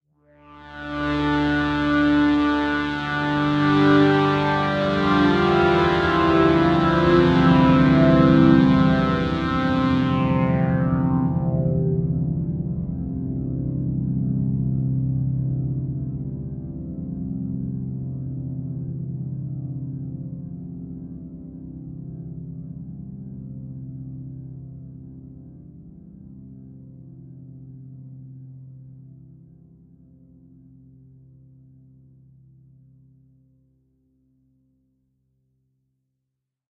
Some good long cinematic pads. Chorus, reverb, blur, multiband compression, a tiny bit of flange, and some bass boost to finish it off.
ambient, dramatic, epic, instrumental, light, melody, pad